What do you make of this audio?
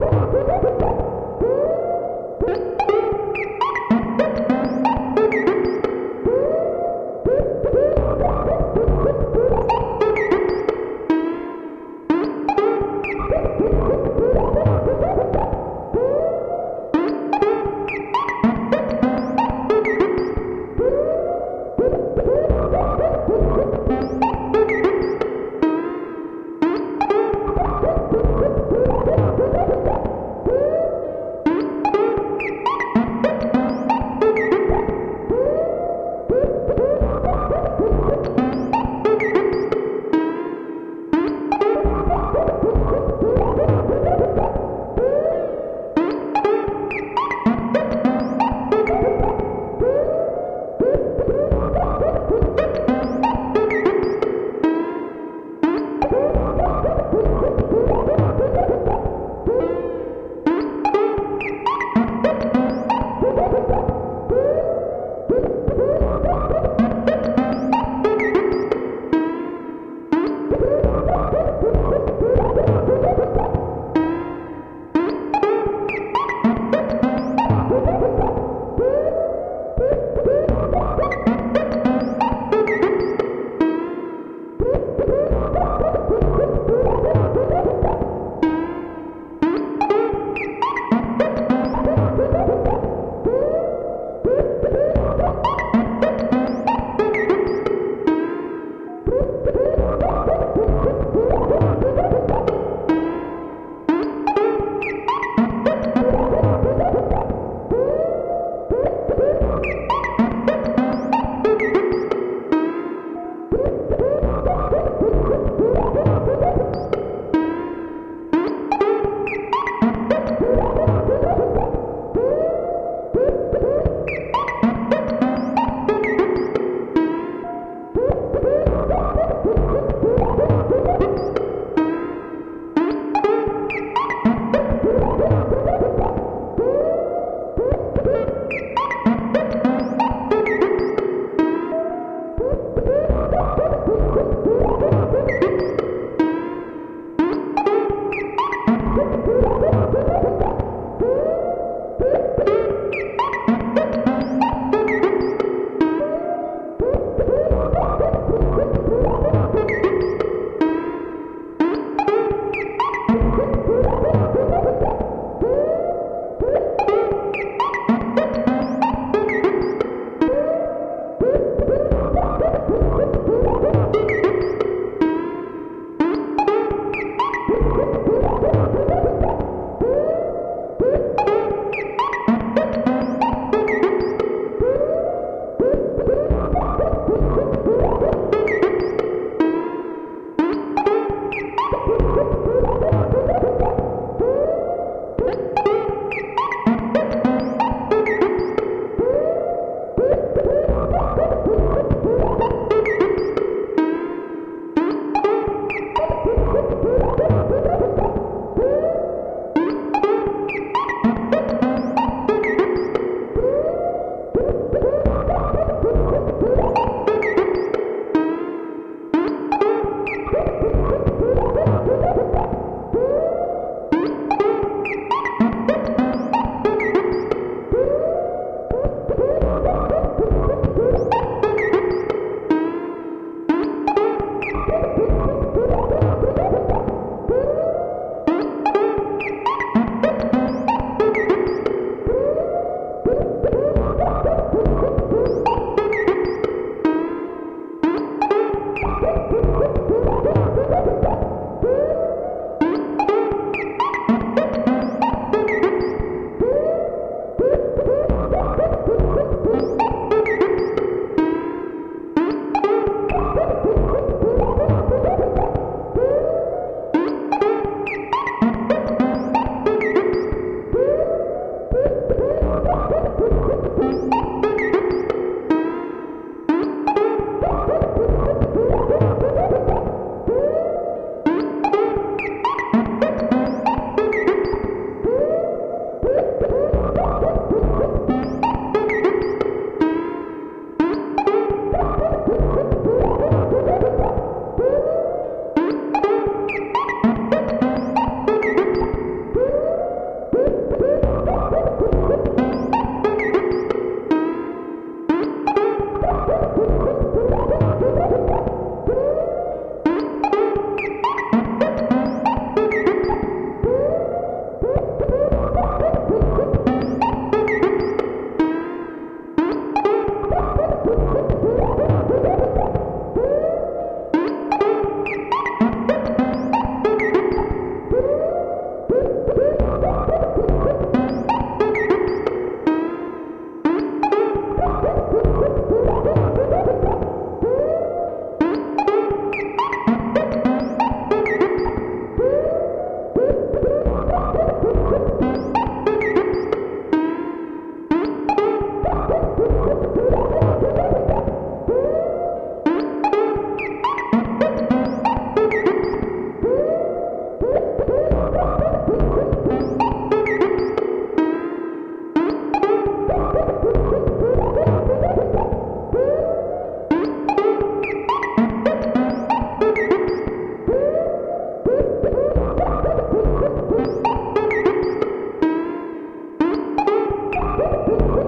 Random repetition RAW
Korg Monotribe processed by a Doepfer A-100. (BBD and A-199 Spring Reverb)
The clock source is semi-randomized by an LFO and Korg SQ-1.
Recorded with a Zoom H-5 and transcoded with ocenaudio.
nasty, Eurorack, bell, radiophonic, noisy, retro, sound-design, sound, future, Science-Fiction, fx, raw, alien, experimental, modular, sequence, west-coast, synthesizer, electronic, synth, 70s, weird, sci-fi, 60s, random